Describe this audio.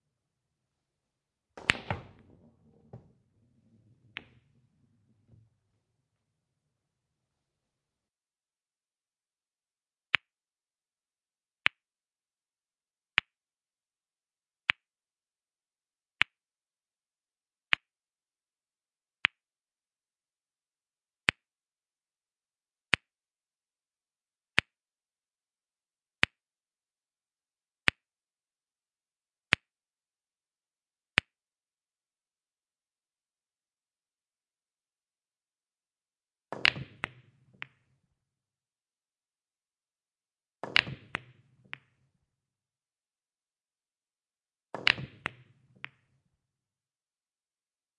Billiard Ball percussive hits
Just some billiard balls hiting here and there
tension, zoom